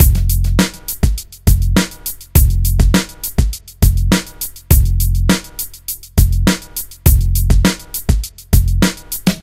Stezzer 102 Break 3
This is another break I made in Jeskola Buzz, from a variety of free percussive hit samples. There are 4 back to back breaks that differ slightly for variety.
102, 102bpm, 808, bass, beat, beats, break, breakbeat, club, dance, drum, hip, hop, infoatstezzerdotcom, kick, loop, samples, stezzer